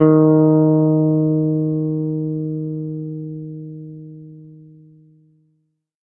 Second octave note.